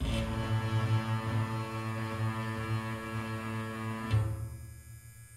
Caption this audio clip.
weak electric - weak electric
Electric shaver, metal bar, bass string and metal tank.
processing, motor, tank, metal, engine, shaver, metallic, electric, Repeating